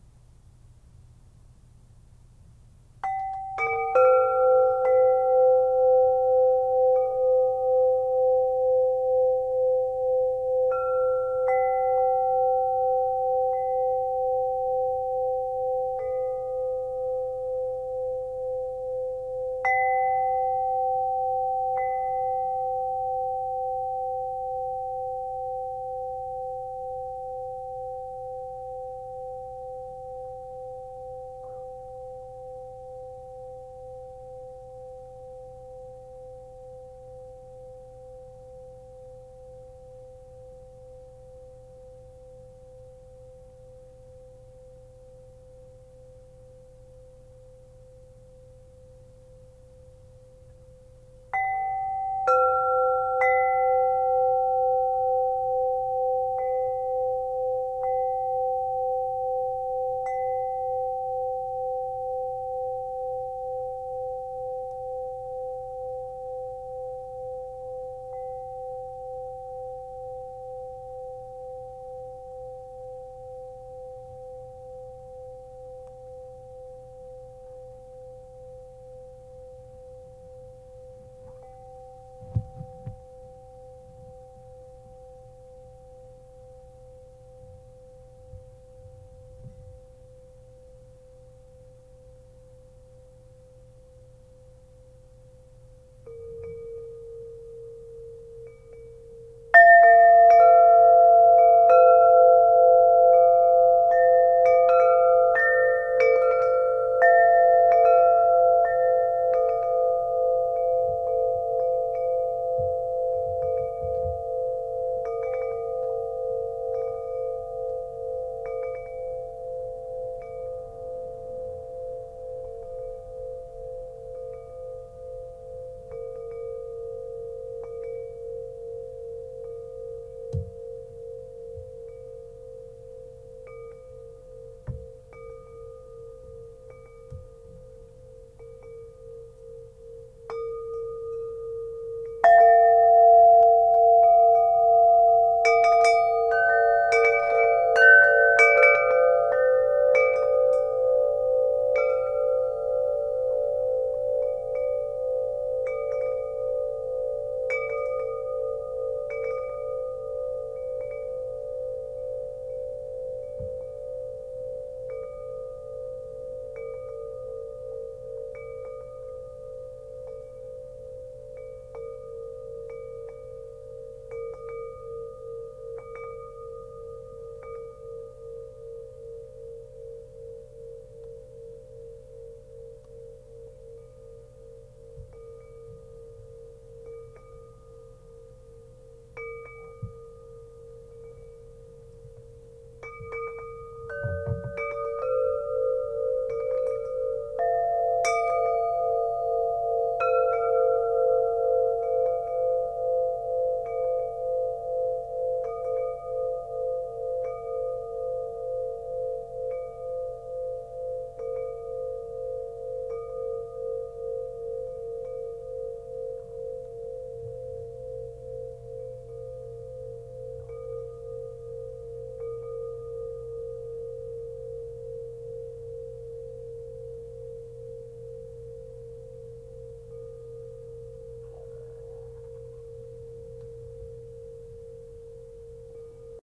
Five rakes of a large wind chime recorded in the studio.
I was producing a track for a singer / songwriter who has a beach type song and we needed some effects to add to the atmosphere and wind chimes was one of a few. This is a clean recording. You can use this in all kinds of outdoor samples etc. I recorded five passes for a few different chimes. This recording is unprocessed and has not been edited. Hope you can use them and thank you!
-Boot
Beach, Chime, Chimes, High, Quality, Sound, Sounds, Studio-Recording, Wind